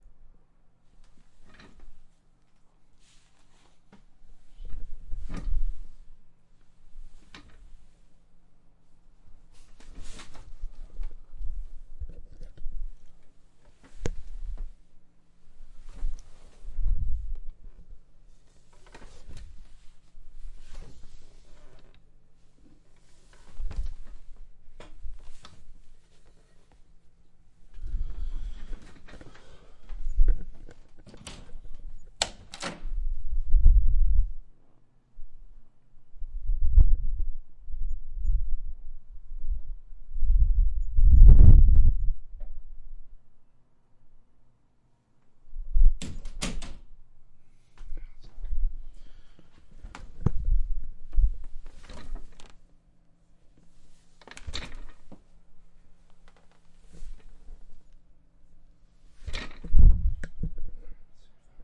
Sitting Office Chair
A few takes of a sitting down in a Herman Miller Aeron, the chair of choice for a lot of companies these days. All Med-Close, recorded with an H6 XY pair
chair, office